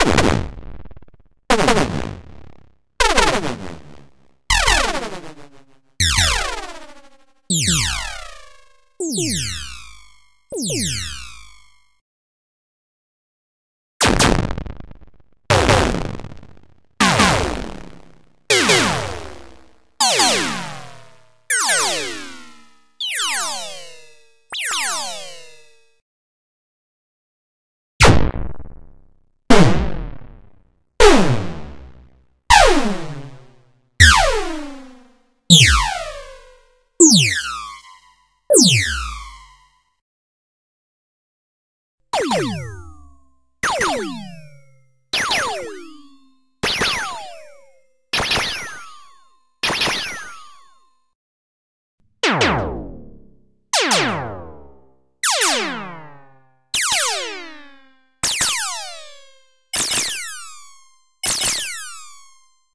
Laser compilation 05
Created using the Korg Electribe 2 (the synth variant) analogue modeling synthesis engine and FX.
If you don't like the busywork of cutting, sorting, naming etc., you can check out this paid "game-ready" asset on the Unity Asset Store:
It's always nice to hear back from you.
What projects did you use these sounds for?
action, arcade, blast, blaster, classic, electronic, fire, game, gun, laser, lo-fi, phaser, retro, science-fiction, Sci-Fi, ship, shoot, shooting, short, shot, simple, space, spaceship, synthetic, video-game, weapon, zap